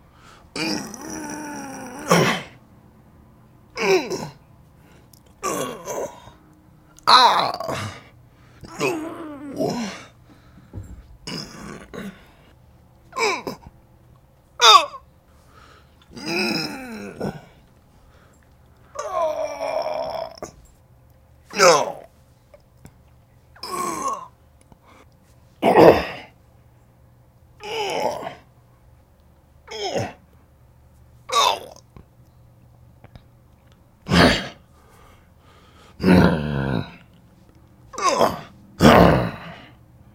fight moans and grunts
recorded with Sennheiser 416 and h4n
mono
fight, grunts, moans